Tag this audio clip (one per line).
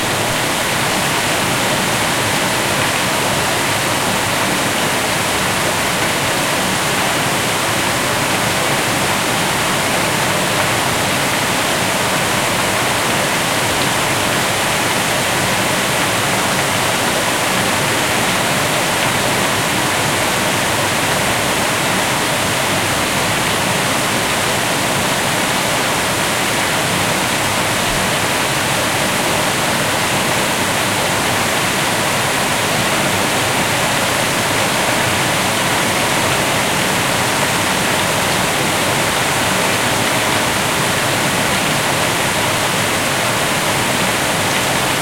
babbling
flow
liquid
gurgle
mill
flowing
rushing
water
lapping
stream
loop